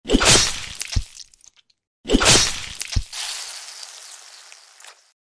Decapitation (more gory)
A lot of effort and time goes into making these sounds.
This evolved out of a decapitation sound effect I created for a game. For this one, the moment when the weapon slices into the neck sounds more fleshy and loud.
I've credited you all below this paragraph:
- Beheading SFX by Ajexk
- Blood Gush / Spray by cliftonmcarlson